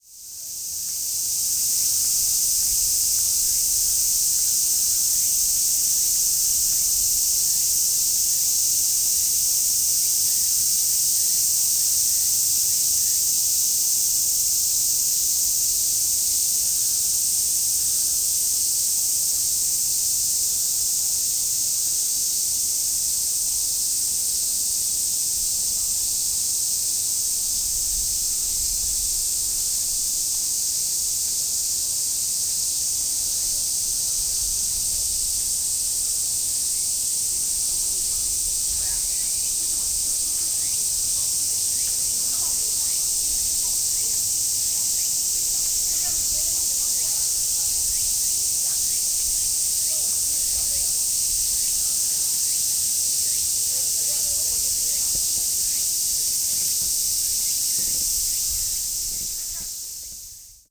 Imperial Palace Garden (part 1) - August 2016
Crickets having some fun in the Imperial Palace Garden. A very hot day!
Recorded with a Zoom H6 in August 2016.